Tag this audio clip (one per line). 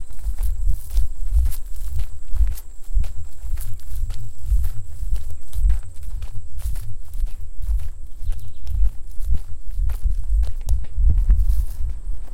grass
crunch
footstep
gravel
walking
footsteps